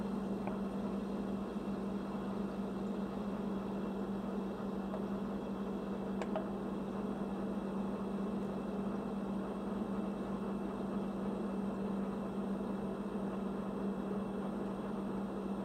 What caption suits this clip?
Fridge running
refrigerator, fridge, kitchen, appliance, droning, hum